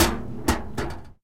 opening and closing mail slot